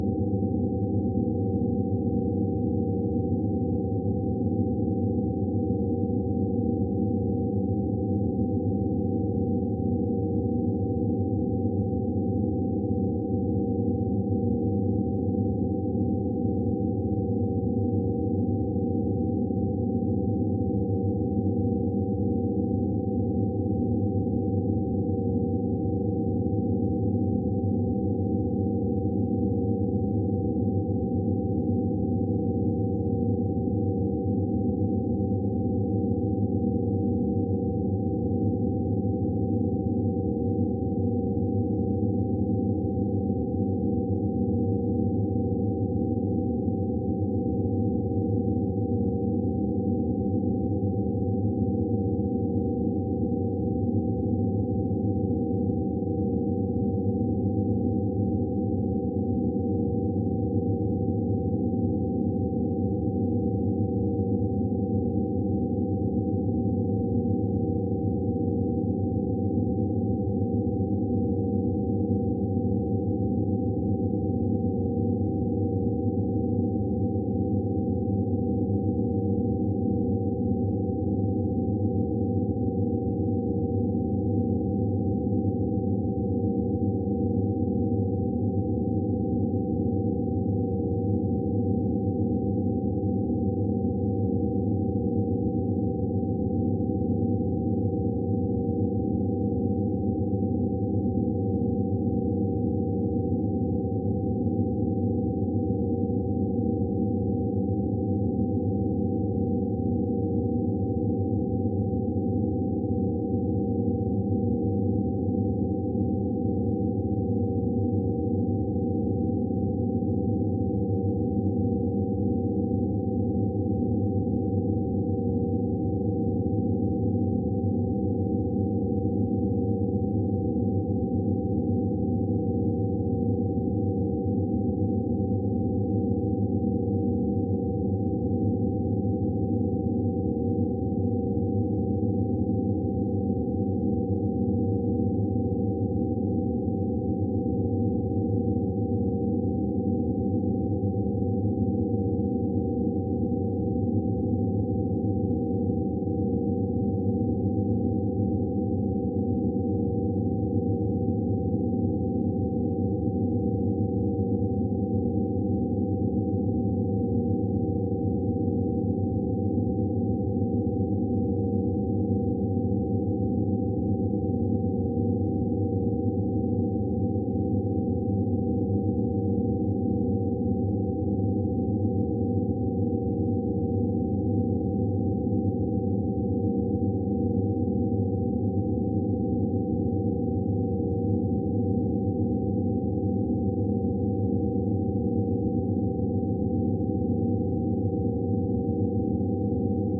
BeeOne Loop 20130528-133203
Mad Loop made with our BeeOne software.
For Attributon use: "made with HSE BeeOne"
Request more specific loops (PM or e-mail)
ambient, background, electronic, experimental, loop